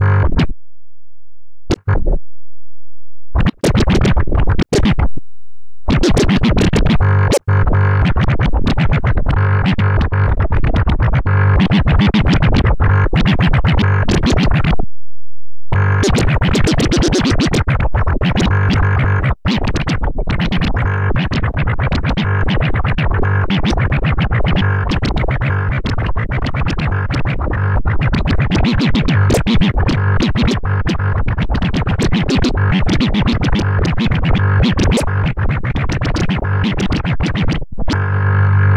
Analox x scratching test rendered direct to drive during new sound mapping test. These tests are performed daily to protect the public and ensure the quality of these sounds. Thank you all... digital dissecting recommended.